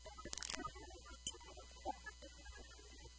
vtech circuit bend018
Produce by overdriving, short circuiting, bending and just messing up a v-tech speak and spell typed unit. Very fun easy to mangle with some really interesting results.
circuit-bending, broken-toy, music, noise, digital, micro, speak-and-spell